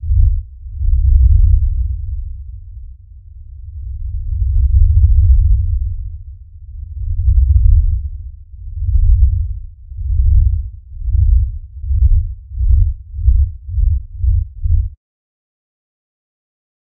Great on subwoofer!
frequency, lo-fi, low, modulation, rumble, sub, volume